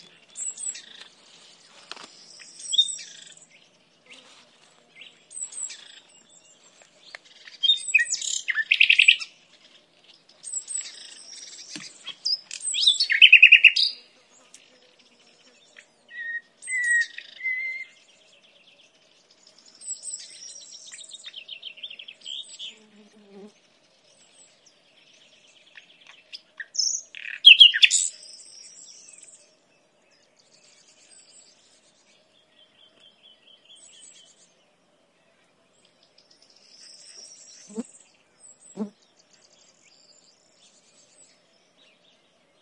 Short recording of a Nightingale singing and insects buzzing near Castelo de Vide (Alentejo, Portugal). Recorded with Audiotechnica BP4025, Shure FP24 preamp, PCM-M10 recorder.
alentejo, ambiance, field-recording, mediterranean-forest, nightingale, portugal, spring